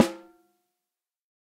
For each microphone choice there are eleven velocity layers. The loudest strike is also a rimshot. The microphones used were an AKG D202, an Audio Technica ATM250, an Audix D6, a Beyer Dynamic M201, an Electrovoice ND868, an Electrovoice RE20, a Josephson E22, a Lawson FET47, a Shure SM57 and a Shure SM7B. The final microphone was the Josephson C720, a remarkable microphone of which only twenty were made to mark the Josephson company's 20th anniversary. Placement of mic varied according to sensitivity and polar pattern. Preamps used were Amek throughout and all sources were recorded directly to Pro Tools through Frontier Design Group and Digidesign converters. Final editing and processing was carried out in Cool Edit Pro.